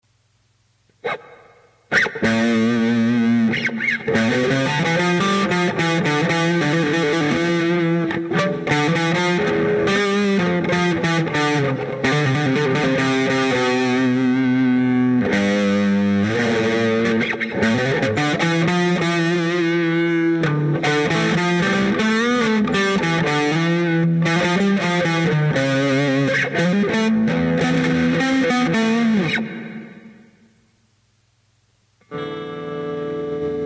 test elecdict vol1
Testing the DS-40 in various USB class settings to determine if the unit can work as a cheap USB interface. Various settings of mic sensitivity and USB setting.Electric guitar direct from processor thru unit via USB to laptop. Adjusting volume to try and prevent clipping and still produce decent tone.